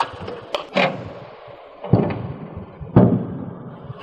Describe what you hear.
Incidental loop made from an old lock hitting against a metal door.